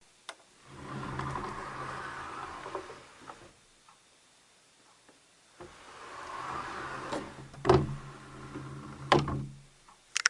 Opening/closing sliding closet doors #1
Opening and closing a closet drawer with a slow speed.
open, opening, closing, closet, doors